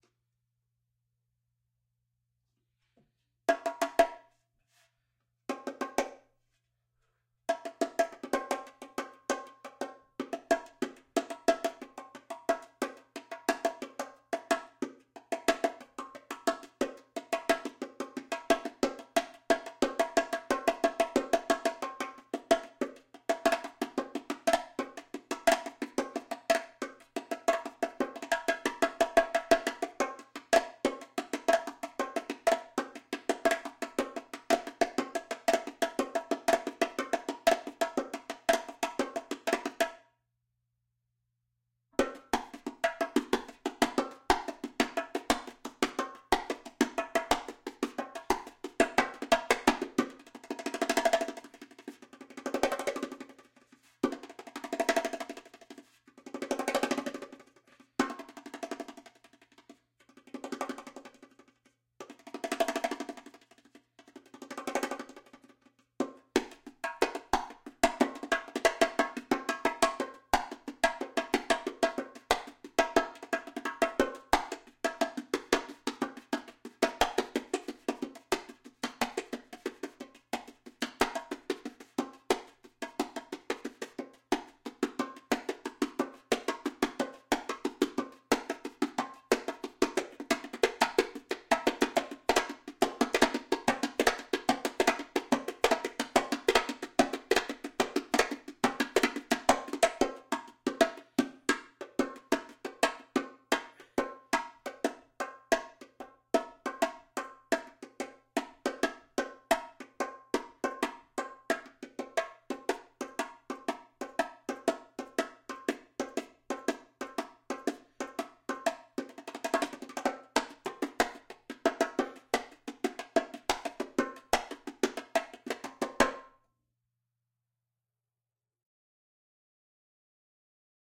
Bongo track 120 BPM solo
Here's a bongo track I performed and recorded that was used in an ethnic style tribal track. You can drop this on the timeline at 120 BPM. It's an 8th note triplet feel in 4/4 time and it will line right up. Plenty of good stuff to pull from here. Enjoy!